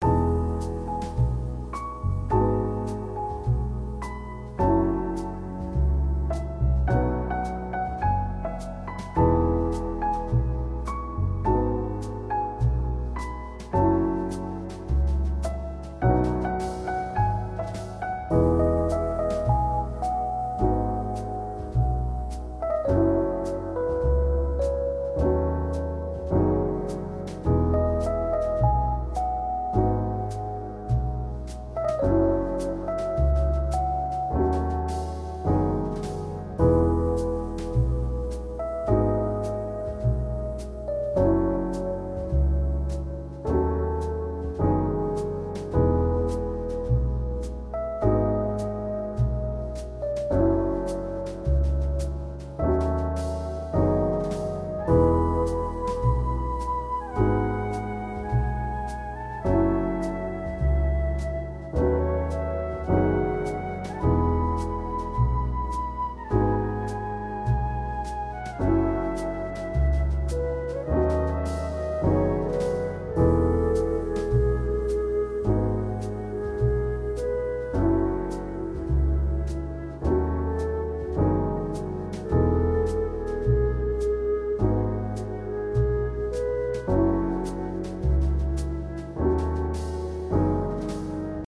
Jazz Background Music Loop
Track: 47
Title: Standby
Genre: Jazz
I've been listening to hotel music and got inspired to compose this Jazz genre. I'm using FL Studio with some free samples & VST. I know Jazz is colorful beings, I'm sorry for chords repetition because it's hard to make it natural and I don't have a keyboard controller either.
ambient, bass, drum, electric-piano, loop